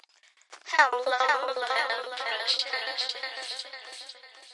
HELLO PREESOUS
terrifying, thrill, sinister, haunted, Halloween, creepy, hell, spooky, terror, scary, Ghost, phantom, Nightmare